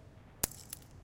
throw pencil
Sound caused by a pencil falling in a hall.
The ambience sound of hall and the reverberation of pen fallen is perceived.
campus-upf, fall, pencil, UPF-CS12